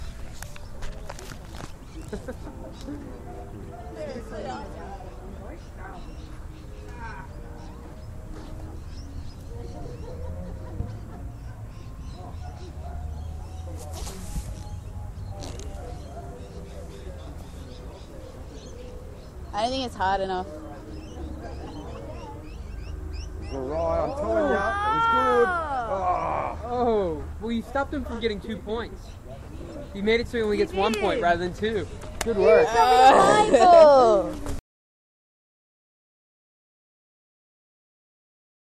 Recorded on an MP3 player using the voice recorder. Recorded at the Concord RSL Women's Bowling Club on a Sunday. Recorded by walking next to bowl while rolling towards the jack.
ambient, australia, bowls, english, field, grass, lawn, recording, sport